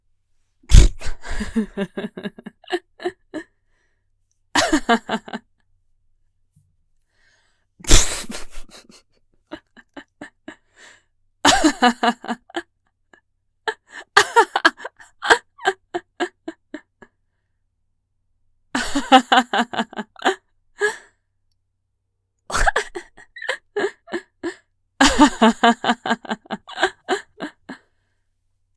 30 seconds of female laughter. Somewhat incredulous. Good-natured.